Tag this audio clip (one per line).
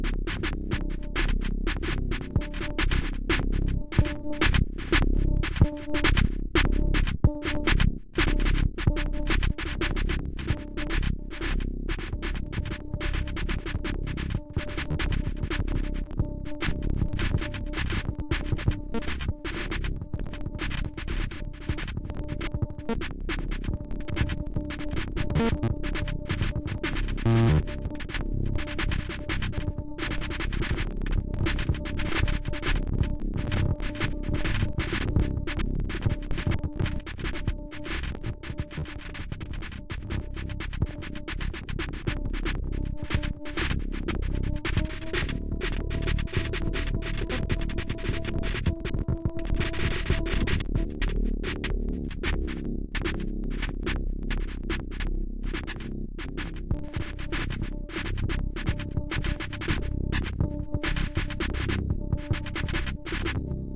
weird
noise
glitch